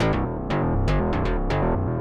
MOV. baix electro
electronic bass computer Logic
bass, electronic